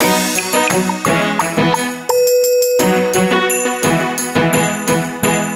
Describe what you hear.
It must be svpring
A loop from a song I've been working on
orchestral, lush, ostinato, bright, springlike, motif, loop